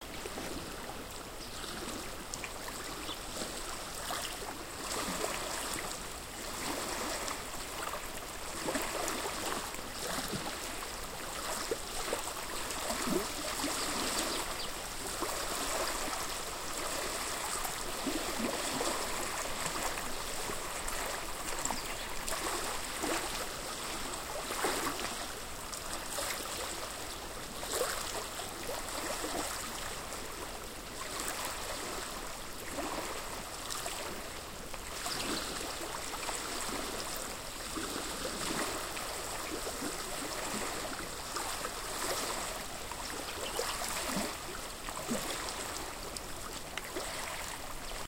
Tiny waves lapping #3
Small waves lapping on shore, Lake Pedder. Recorded 20 March 2019 with Marantz PMD661 internal mic in stereo.